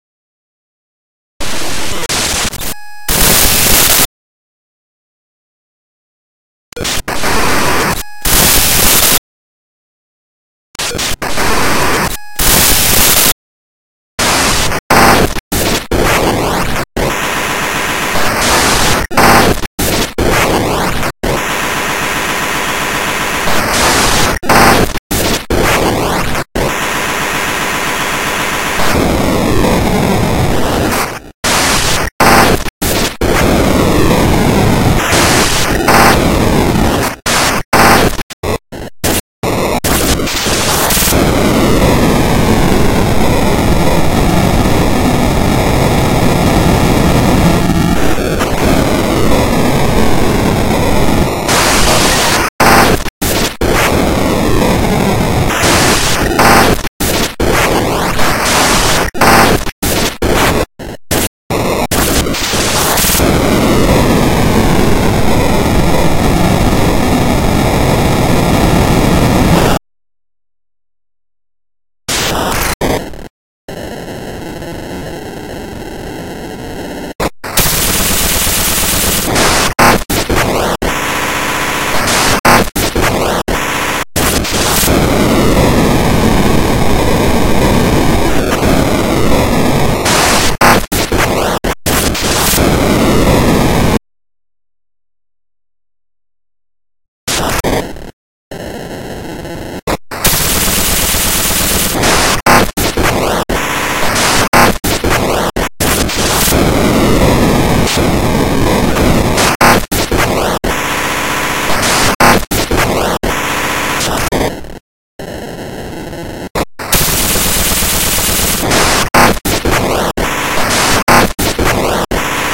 VERY LOUD. More noises and bleeps produced by opening DOS executable files on Fast Tracker II.